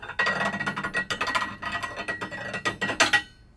Metal rattle
rattle that could function as drawbridge
drawbridge, texture, metal, rattle, mechanics